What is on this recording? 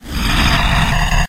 guacamolly hev
Mids 'screech/fade' in... highs pan... sounds like the Devil trying to stop his dump truck.
squeal, screech